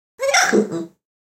Cartoon Hiccup
Hiccup - cartoon character voice.
voice, hic, Cartoon, character, hiccup